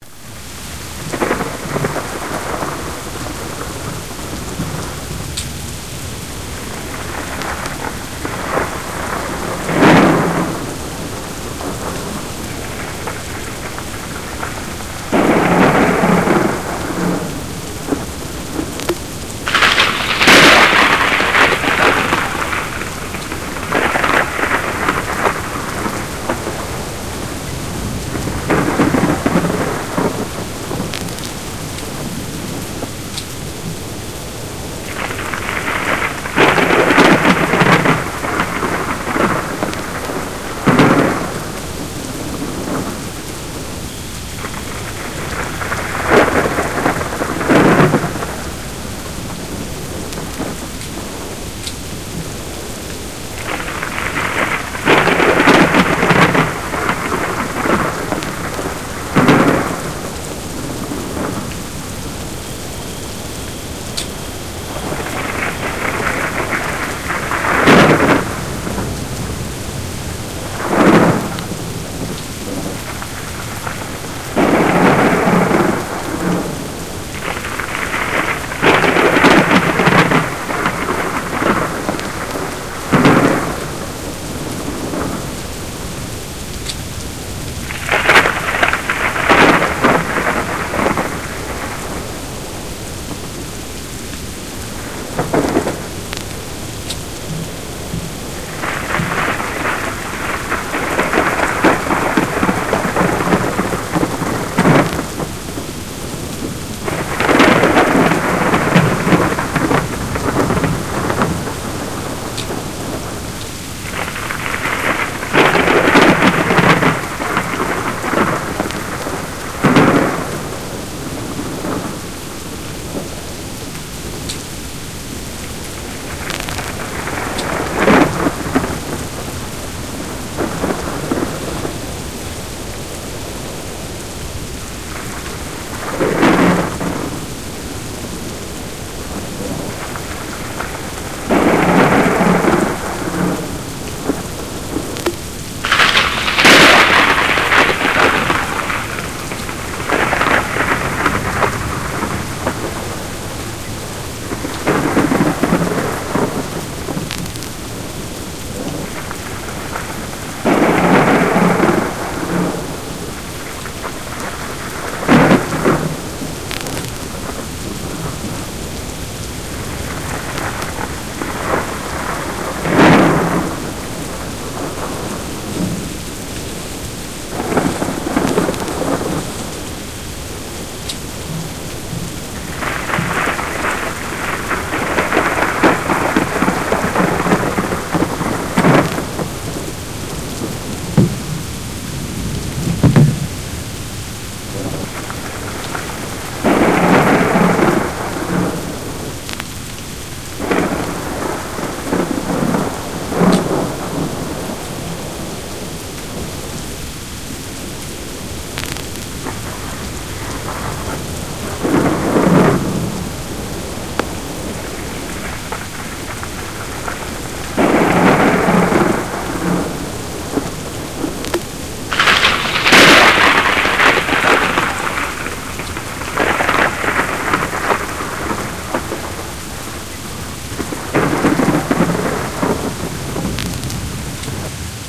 large-storm-merged
This clip was made because I was looking for a strong thunderstorm sound effect for a school play.
(I could be wrong of course!)
Hope this helps someone looking for a long, strong storm with high winds, loud rain, and many thunderbolts! (the thunderbolts are duplicated throughout the file; the original file contained a much more natural and less angry storm)
compilation, hurricane, loud, mix, rain, roaring, storm, thunder, wind